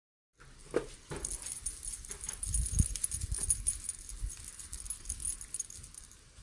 A light, tinkling jingle made by me shaking a handful of necklace chains together.